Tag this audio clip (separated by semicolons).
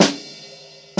Beyerdynamic-TG-D70; dataset; drums; drumset; sample; snare; snare-drum